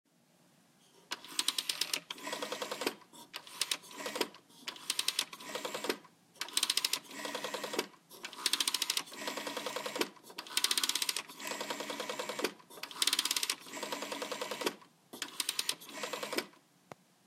A phonecall is made on an old phone.